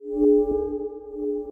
semiq fx 3
freaky, abstract, lo-fi, delay, effect, electronic, electric, sounddesign, sci-fi, sound, sound-design, weird, fx